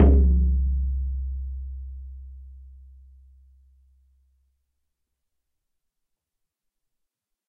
Shaman Hand Frame Drum 04 03
Shaman Hand Frame Drum
Studio Recording
Rode NT1000
AKG C1000s
Clock Audio C 009E-RF Boundary Microphone
Reaper DAW